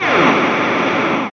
Please refer to the first sample and previous samples in this sample pack for a description of how the samples where generated.Sounds like one single space gun being fired.